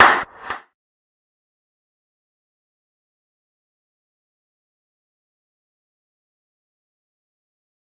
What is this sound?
clap 3 reverse reverb
Reverb, ZoomH2, Reverse, Clap